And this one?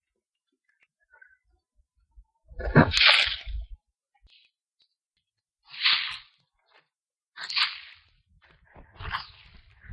Parque da Cidade - Areia a cair
sand, ulp-cam